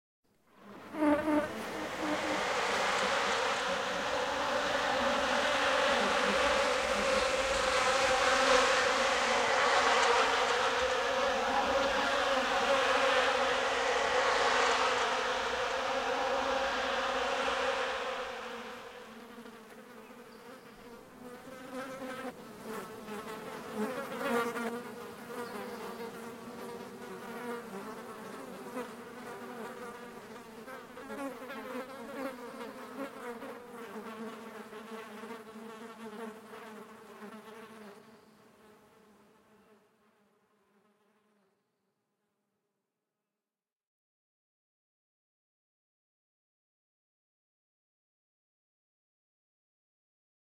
Flies swarm
buzzing, insects, flies